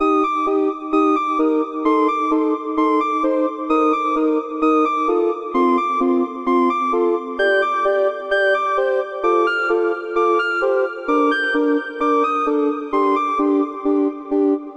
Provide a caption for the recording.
Created in FL Studio with BlueII Synth by Rob Papen.